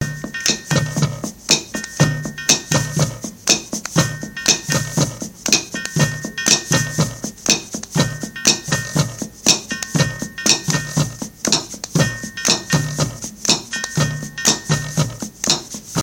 WHAT YOU DO2 Percussion
A collection of samples/loops intended for personal and commercial music production. All compositions where written and performed by Chris S. Bacon on Home Sick Recordings. Take things, shake things, make things.
melody, acapella, sounds, drums, original-music, whistle, vocal-loops, Indie-folk, loops, indie, synth, Folk, bass, guitar, percussion, loop, piano, beat, samples, harmony, voice, rock, looping